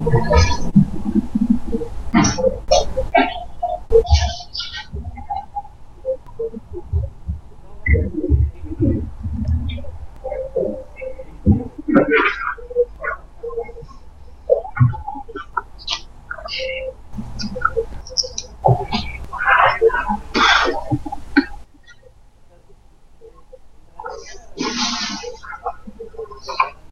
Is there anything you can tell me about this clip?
Part 1 of 3, Burbling.
While on Skype, someone I was calling left the mic on while he packed for a trip. A transmission error caused a unique distortion effect while he was moving gear and typing.
These are the background burbling sounds, caused by low-level signal being artificially enhanced by Skype... possibly.